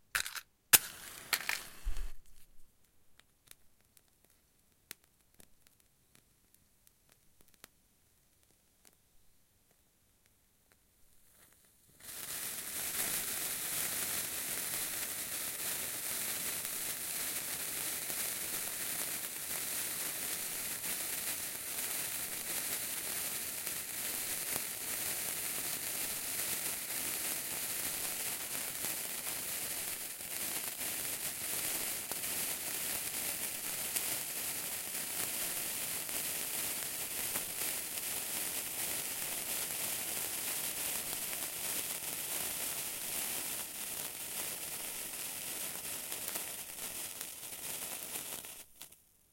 Two sparklers recorded simultaneously about 25cm apart and 30cm from the mics
Starts with two matches being lit at once.